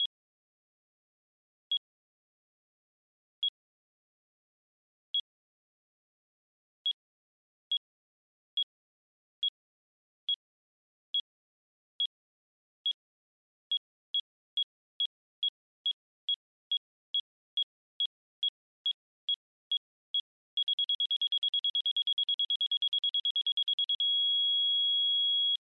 FX - Beep bomb countdown 1
Made with Synth-VST FM8, a simple beep sound for different purposes, like a bomb or any beeping things :)
Comments and ratings welcome :)
effect, beeping, bomb